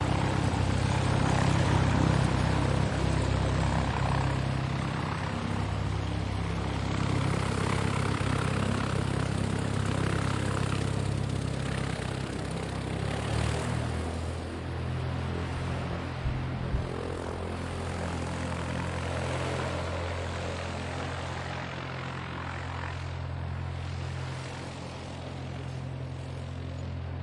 Small Aircraft Katana DV20 - outside
Light Aircraft with engine running before take-off. Recorded with Tascam DR-40
Aircraft, Engine, Flight, Sound